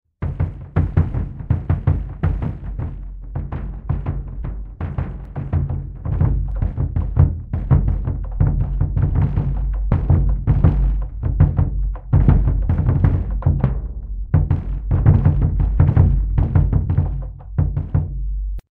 War Drums 5
Keyboard improve processed through virtual synthesizer as ethnic drums.
drum-loop, drums, ethnic, improvised, percussion, percussion-loop, percussive, synth, synthesizer, war